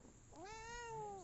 Sonidos de mi casa y mi cuarto
melody, Free, Creative